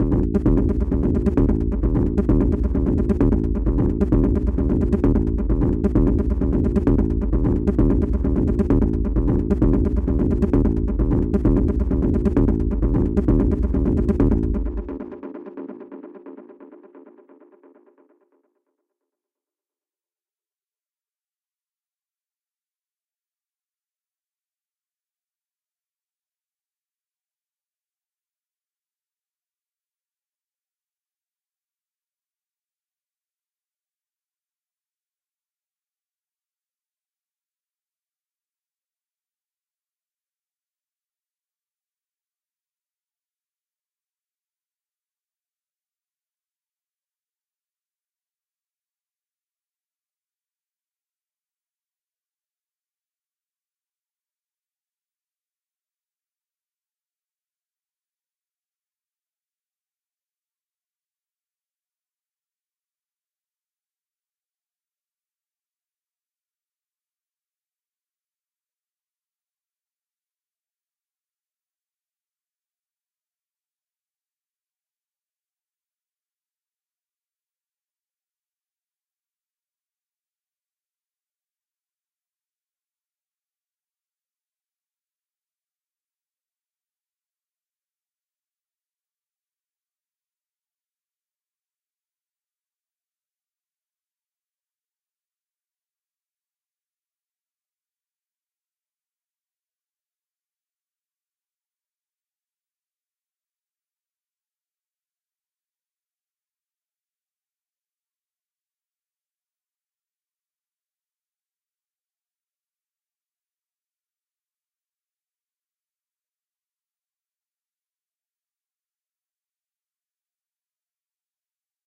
sound crested in ableton with motion delay effect.